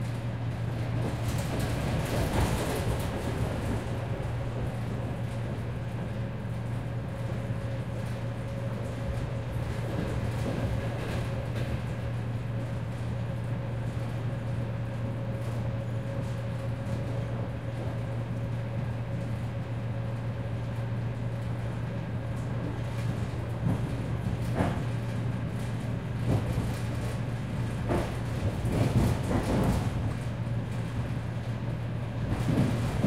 suburban train 1
Moscow region suburban train. Old wagon interior.
electric-train, field-recording, passenger, rail, rail-way, railway, train, transport, travel, wagon